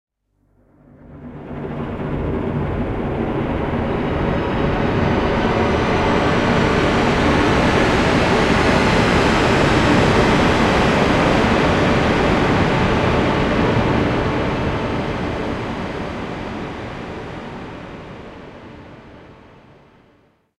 A dystophic scenario, camera pans over a destroyed city. Corpses and shrapnel litters the streets etc... Created with SampleTank XL and the Cinematic Collection.